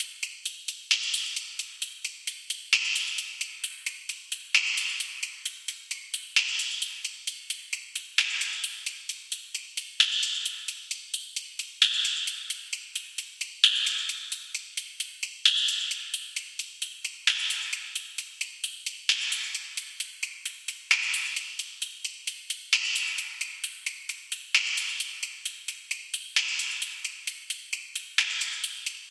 DM 66 DRUMS ONEDROP PROCESSED
DuB HiM Jungle onedrop rasta Rasta reggae Reggae roots Roots
DuB, HiM, Jungle, onedrop, rasta, reggae, roots